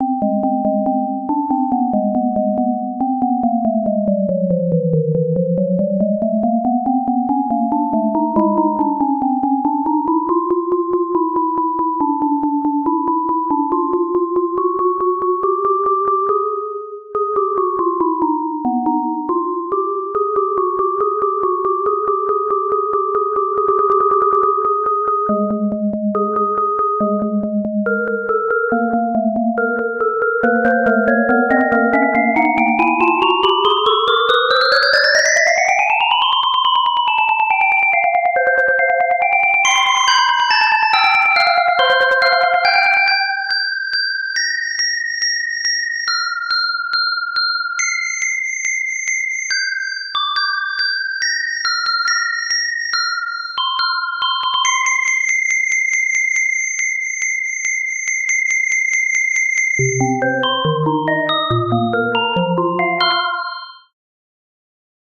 Xylophone only loop